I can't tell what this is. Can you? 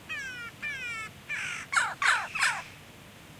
20161002 jackdaw.vocalization.01
Single Jackdaw calling. Audiotechnica BP4025 > Shure FP24 preamp > Tascam DR-60D MkII recorder
birds, field-recording, nature, south-spain, Western-jackdaw